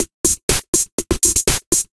untitledbeep-loop-122bpm-perconly
beeps, hats, and snare only
techno, 122bpm, loop